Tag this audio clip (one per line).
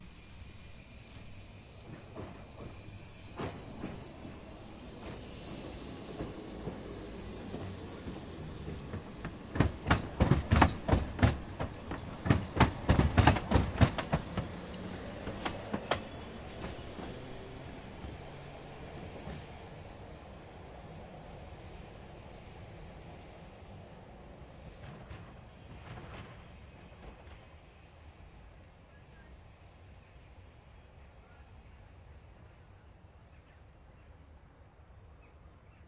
streetcar
rumble
tram
noise
crossroad
railroad
rails